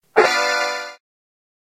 Metal Gear Solid Alarm
Played on a Casio keyboard.
alarm, effects, exclamation, gear, homemade, jumpscare, metal, sfx, solid